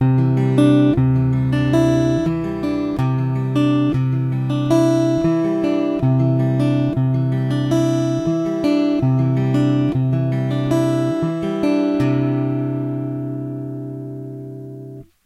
Acoustic C Major picking 80bpm
Taylor acoustic guitar direct to desk.
finger, picking, 80bpm, acoustic, major, c